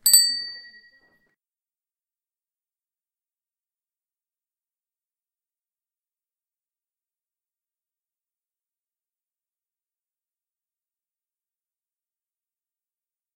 Bicycle Bell from BikeKitchen Augsburg 01
Stand-alone ringing of a bicycle bell from the self-help repair shop BikeKitchen in Augsburg, Germany
mechanic, ride, rider, street